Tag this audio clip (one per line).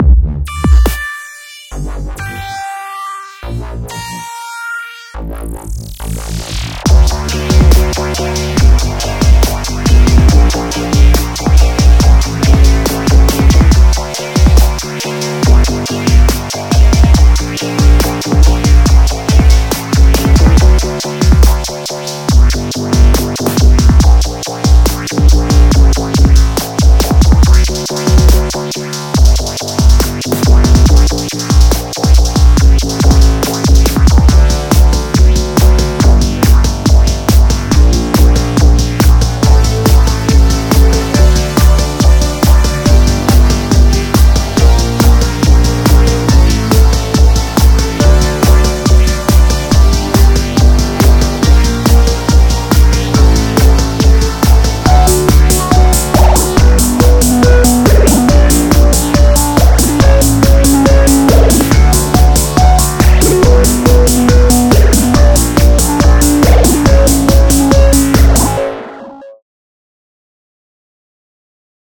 bass
music
dance
club
dubstep